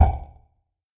STAB 047 mastered 16 bit
A short percussive sound. Created with Metaphysical Function from Native
Instruments. Further edited using Cubase SX and mastered using Wavelab.
electronic, percussion, short